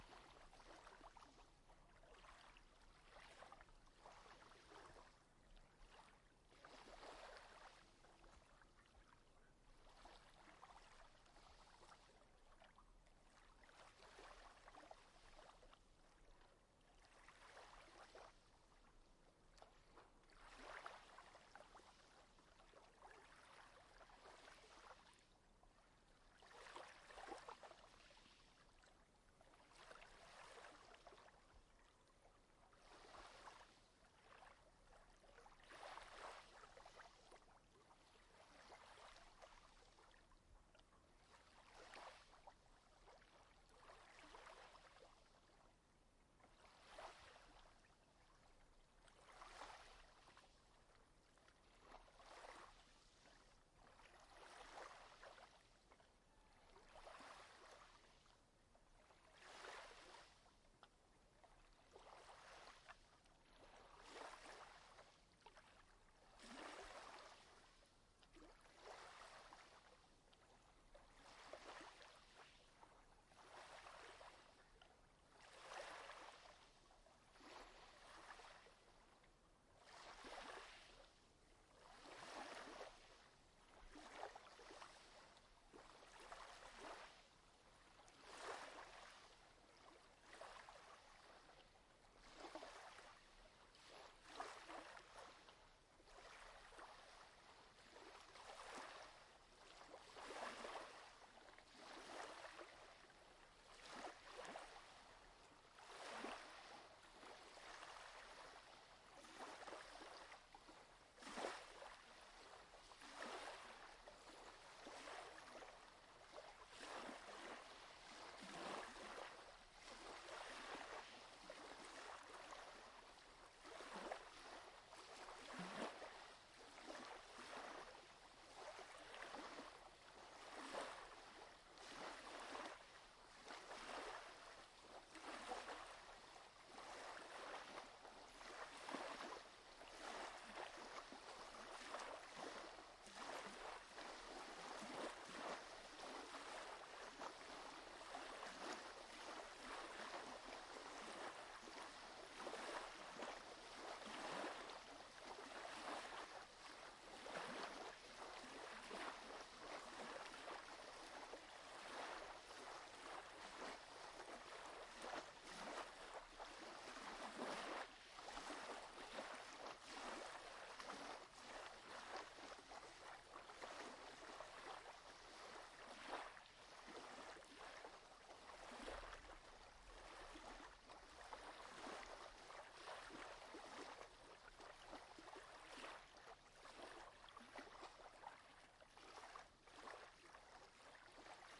Small beach 10 meters away
Beach, water, waves